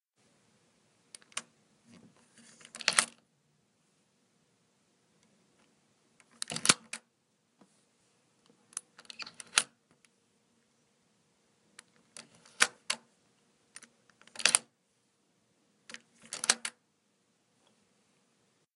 lock unlock door
I locked and unlocked my front door a few times.